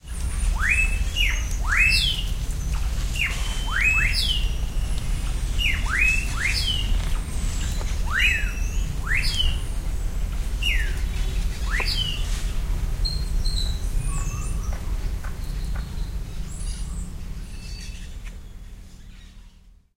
Pajaros Mazunte
I´ve recorded this birds in Mazunte,Oaxaca, México. I´ts a jungle near the beach.
It has been recorded with a M-audio digital recorder in 2011.
birds, field-recording, Rare, jungle